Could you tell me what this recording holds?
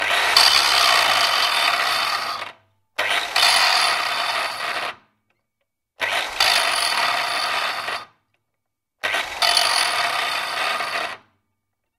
Angle grinder - Fein 230mm - Stop 4 time
Fein angle grinder 230mm (electric) turned on and pushed four times against steel.
4bar, 80bpm, crafts, fein, grind, industrial, labor, loop, machine, metalwork, motor, tools, work